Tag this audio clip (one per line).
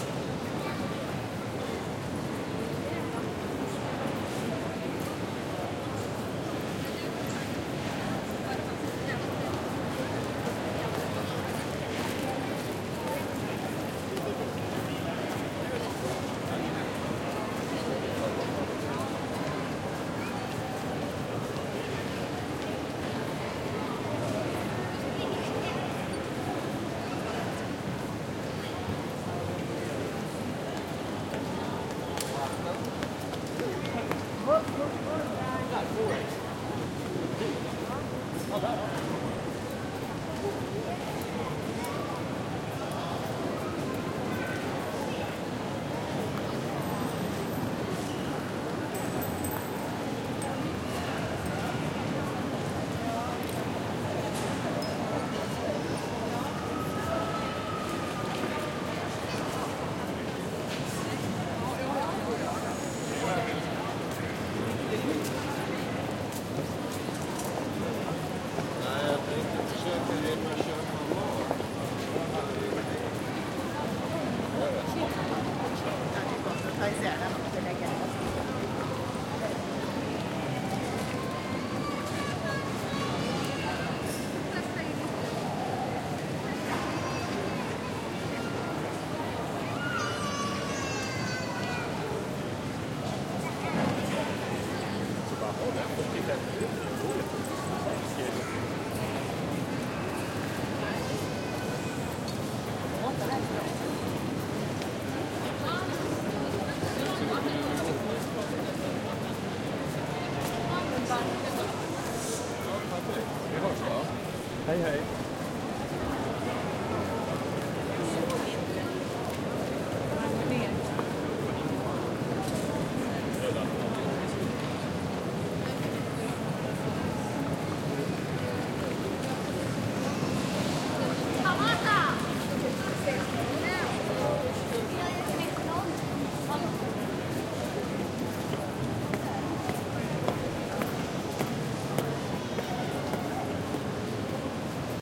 footsteps
centralstation
stockholm
bags